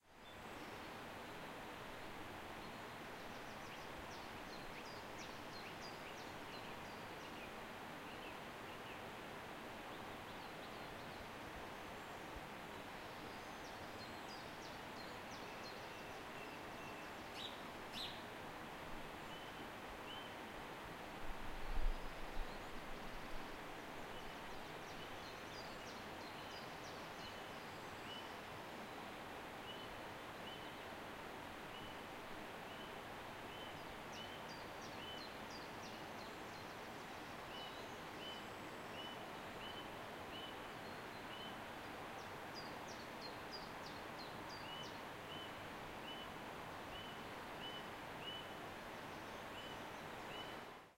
nature river birds
In Setcases, Girona, Spain. Recorded on the balcony of the hotel room in the morning. Our hotel was located close to a water fall. The birds are singing all throughout the clip.
ambience, bird, catalonya, field-recording, girona, nature, setcases, spain, waterfall